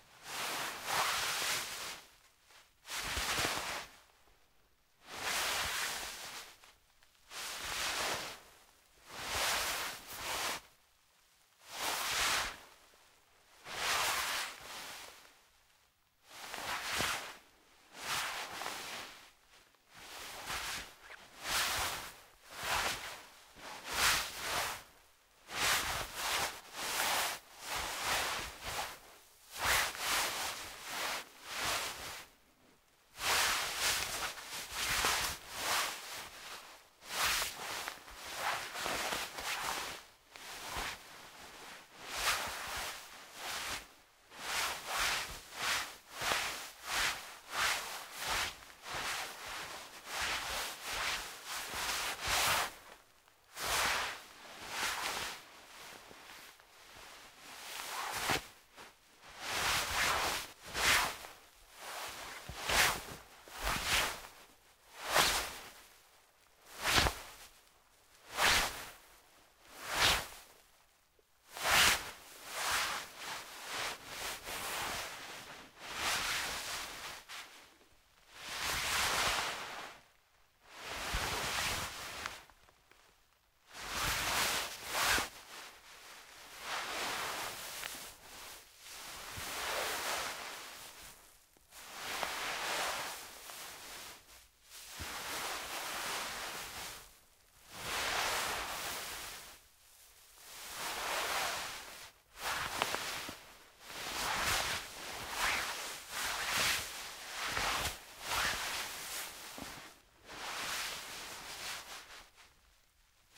fabric movement suit
fabric/clothes movement (Foley)- suit jacket.
MKH60-> ULN-2.
clothes, fabric, Foley, jacket, movement, suit